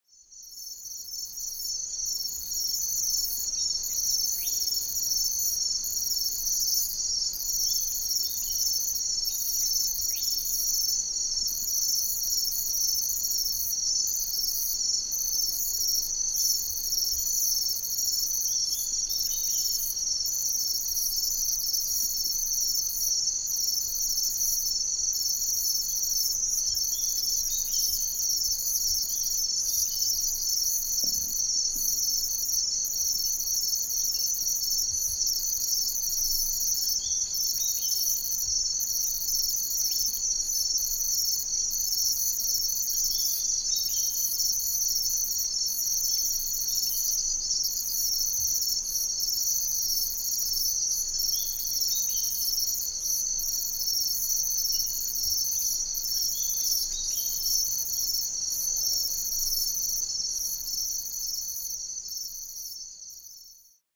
Desert Night Air With Bird Call
I recorded this on a road side one night just south of Lajamanu in northern Tanami Desert in Australia. I was hoping to get the sound of the night air with crickets chirping etc. But a strange thing happened, there was a bird awake, chirping late into the night. I kind of liked it.
atmos, atmosphere, australia, birds, crickets, Desert, evening, feild-recording, insects, night